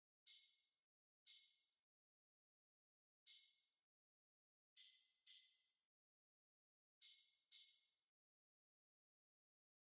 FORF Drum Perkusja 01 Talerze 03
drums, percussion, percussion-loop